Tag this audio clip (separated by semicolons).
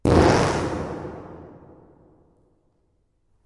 fart; flatulence; test; mic